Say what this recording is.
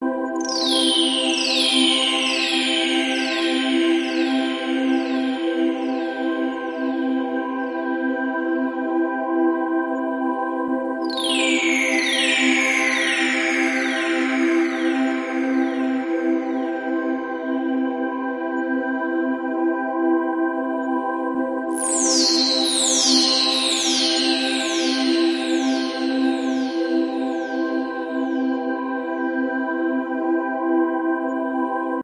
Blade Runners Harmony
blade, night, runner, smooth